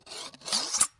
Recorded knifes blades sound.